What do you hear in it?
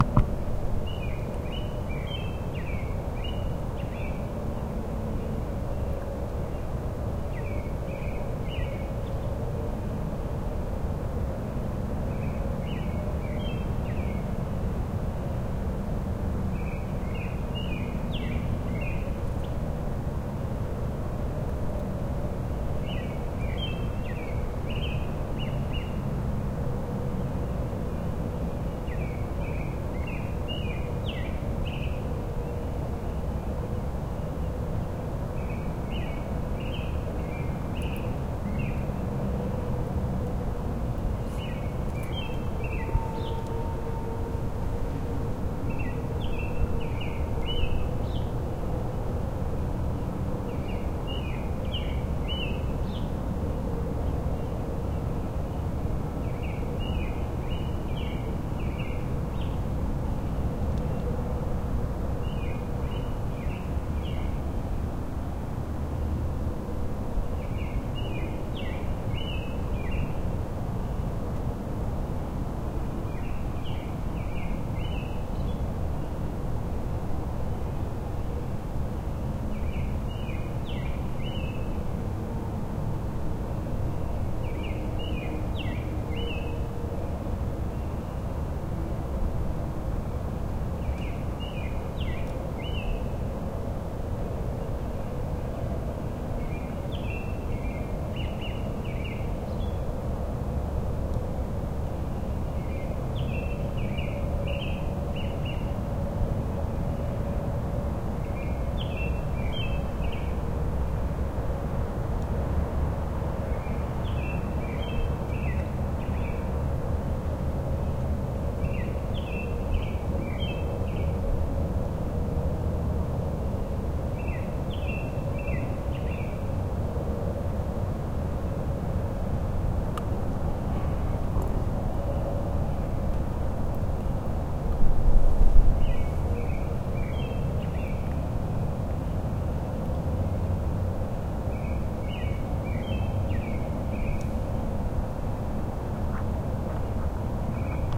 Residential Spring AMB 4AM
ambience, morning